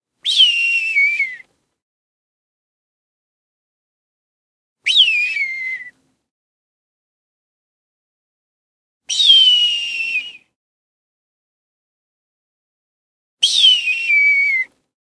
Four versions of a distant hawk cry recreated by way of a whistling technique. Recorded in a car during tech using a Tascam DR40 and edited in Logic.